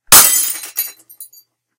Glass Break
Made by breaking a lightbulb. Recorded from my iMac, but had the noise removed. If it ever clips/glitches in the video or audio you use it in, just lower the sound.
break, bulb, glass, large, lightbulb, shatter, smash